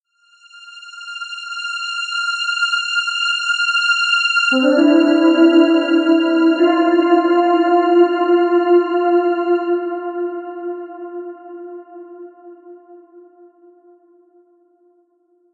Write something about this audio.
a little and very simple music that represents a event, achieve or discovery
achieve, event